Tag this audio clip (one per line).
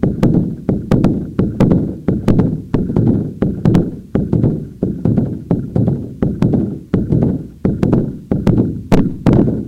bits
fragments
lumps